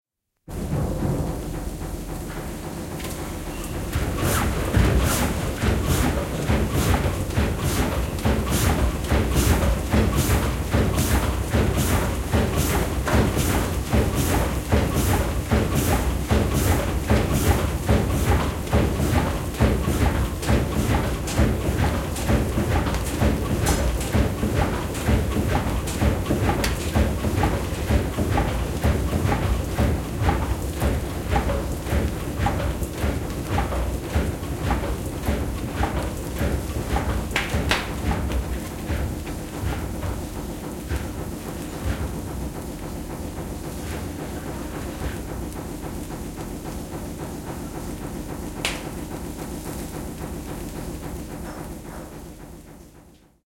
Höyrylaiva, höyrykone, käynnistys / A steamboat, built in 1912, steam engine starts and stops in an engine room
Höyrykone käyntiin konehuoneessa, käyntiä ja pysähdys. Rakenettu Pietarissa v. 1912.
Paikka/Place: Suomi / Finland / Pori, Reposaari
Aika/Date: 13.06.1983
Boat, Boating, Field-Recording, Finland, Laivat, Merenkulku, Shipping, Soundfx